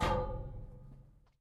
Plastic sewage tube hit 1
Plastic sewage tube hit
sewage, tube, Plastic, hit